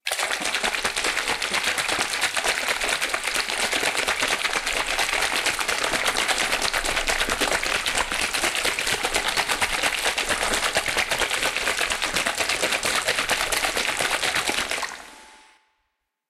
Splashing water in a closed can.